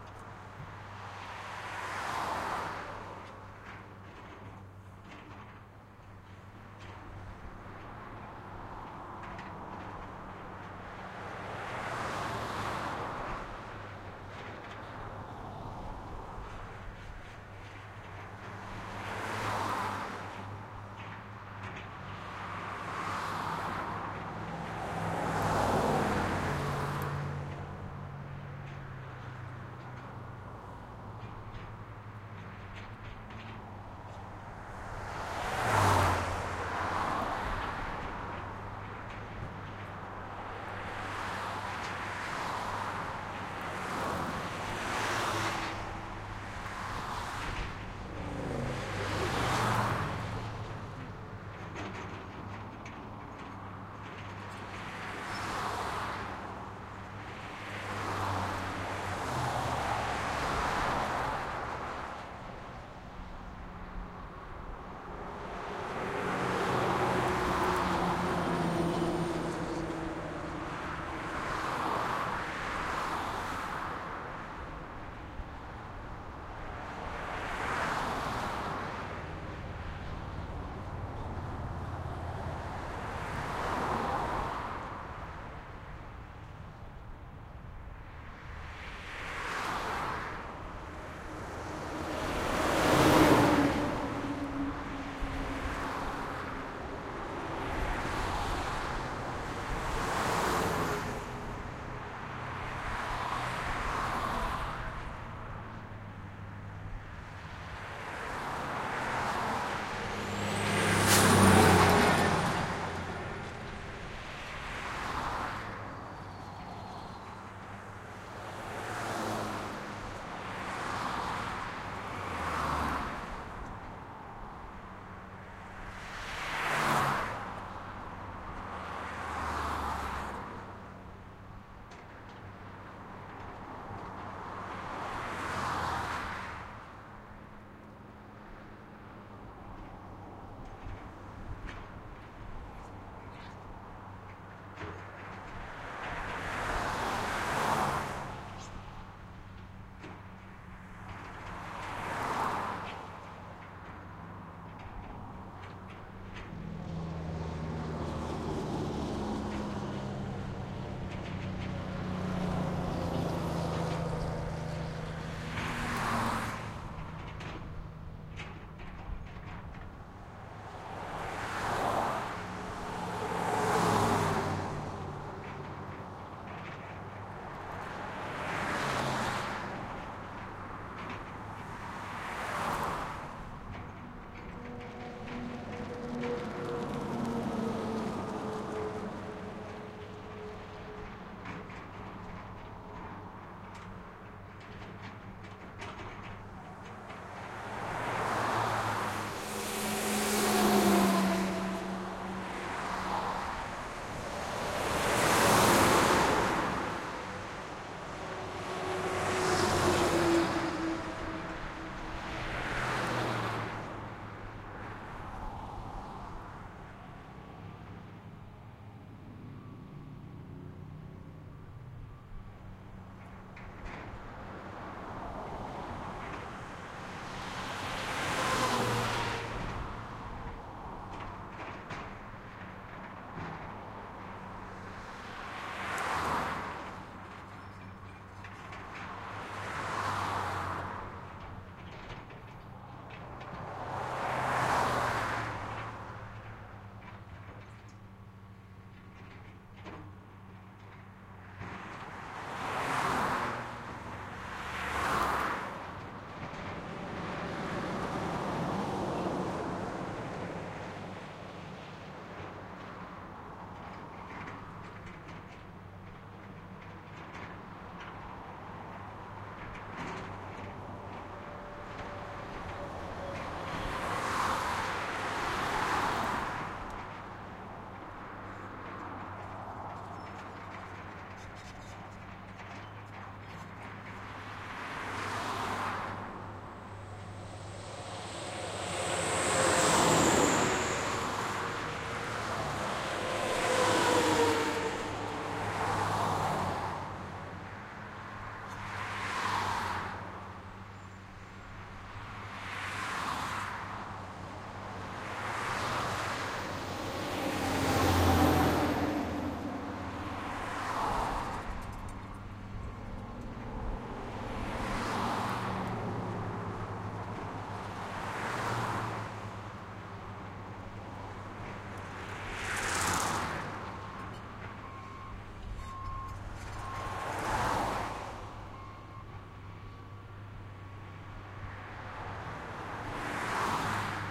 Recorded along the side of Peacekeeper's Way, known locally as the "Sydney Bypass." Throughout the recording, you can occasionally hear a tractor's frame rattling and faint reverse "beep beep" sound; there was construction being done behind me at the time.
At the end, just before I stop recording, I capture a really nice clear sound of the "beep beep" of the tractor's reverse alert.
ambiance, ambient, car, cars, city, driving, field-recording, freeway, highway, motorway, noise, road, street, traffic, truck, trucks, urban